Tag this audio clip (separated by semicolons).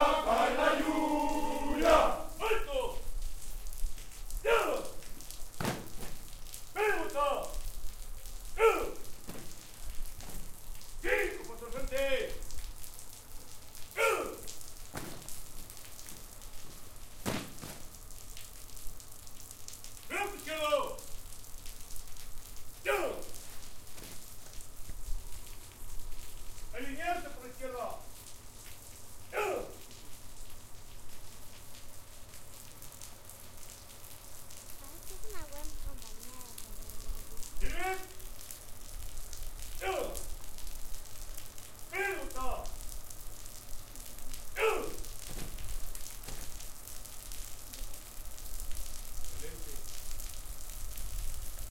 cops; formation; police; rain